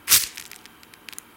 Sword stab 4
blade, hit, katana, knife, meat, slash, slice, stab, sword